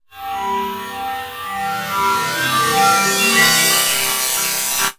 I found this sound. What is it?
Granulated and comb filtered metallic hit